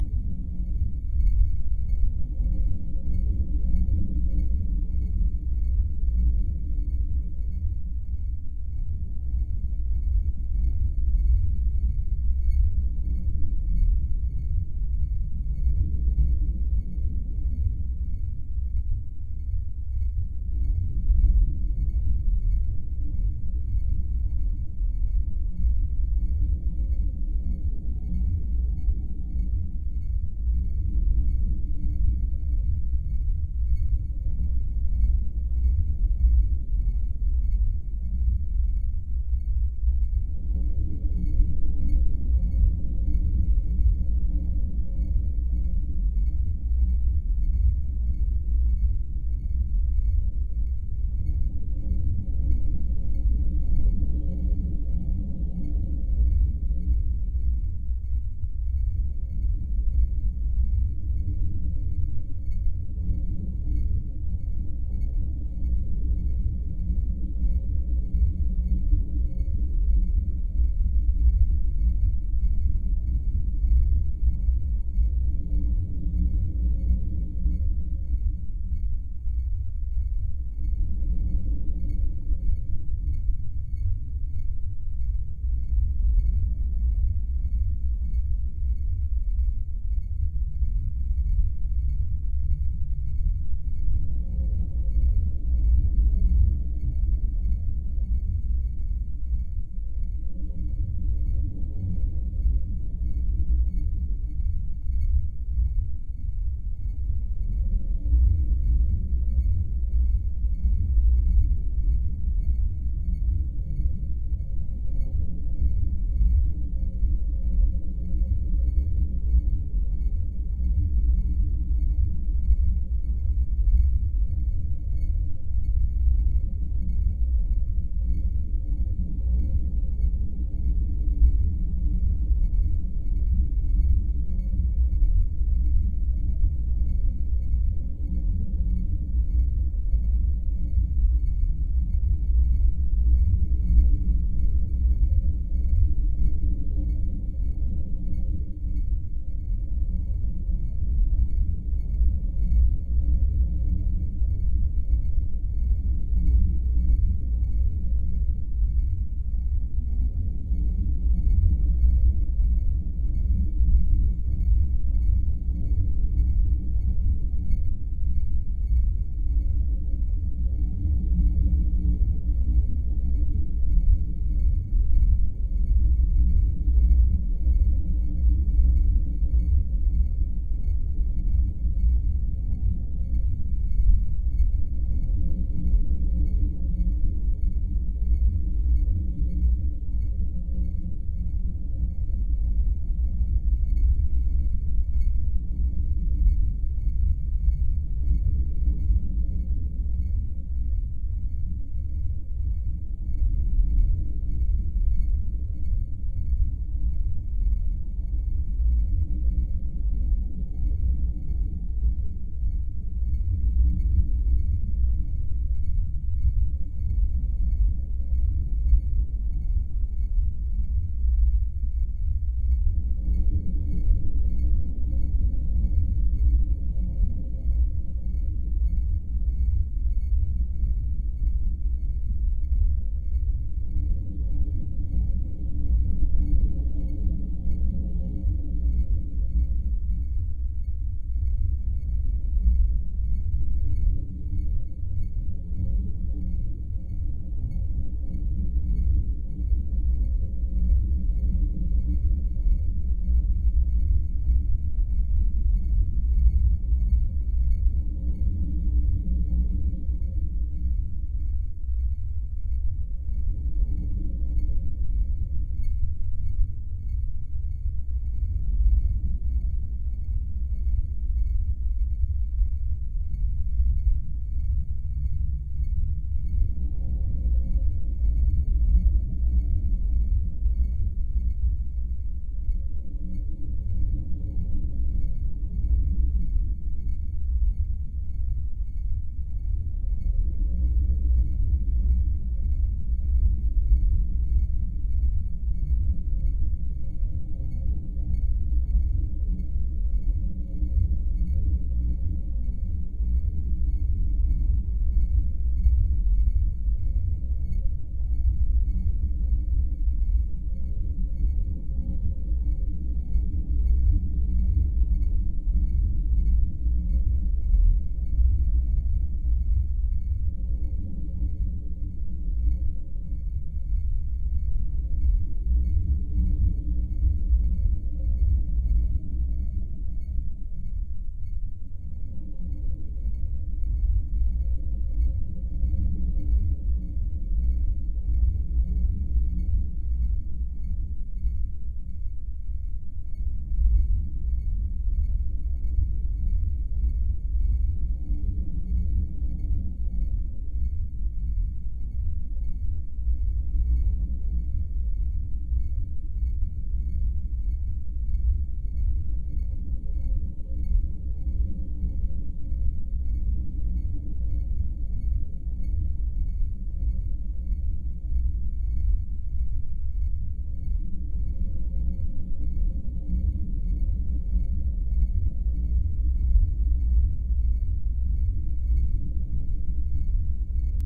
It can be described as an energy shield pulsating. Furthermore analysis dictates that can be sound as an anti-gravity engine or an energy whirling guroscope as a cental matrix of a spaceship. The constraction of the sound consists of an ambient meditative soundtrack "transmuted" using lower tones frequenses, raising bass and phaser pack. Sound application system Audacity.
vibration, drone, noise, Humming, Phaser, Spaceship, engine, atmosphere, soundscape, sounddesign, sci-fi, scape, ambience, Energy, ambient